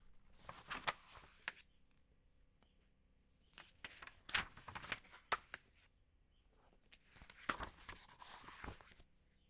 Turning Pages of Book
This is the sound of someone turning the pages of a normal book not newspaper. Recorded with Zoom H6 Stereo Microphone. Recorded with Nvidia High Definition Audio Drivers.
Pages, Turning-Pages